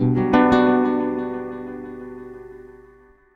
DuB HiM Jungle onedrop rasta Rasta reggae Reggae roots Roots